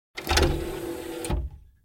cd-player open tray 01
An opening cd-player tray.
Recorded with the Fostex FR-2LE and the Rode NTG-3.
open, tray